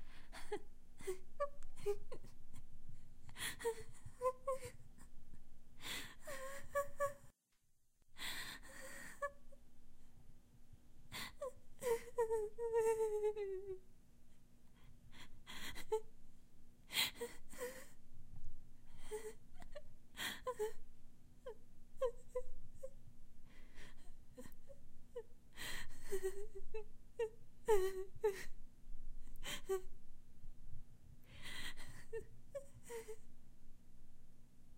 Girl Crying
Me crying like a little girl.
girl, crying, panting, female, cry, cries, sobbing, woman, sniffles, voice